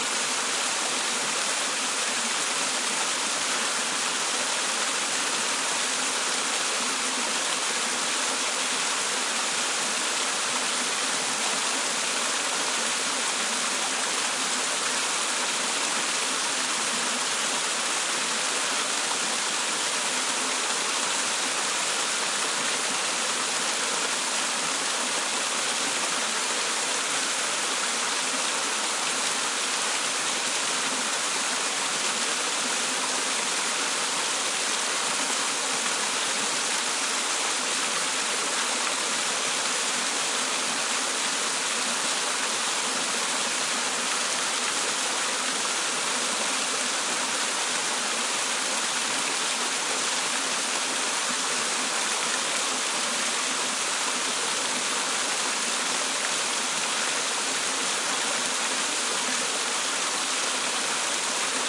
sound of a small waterfall near Peulla (Vicente Perez Rosales National Park, Chile)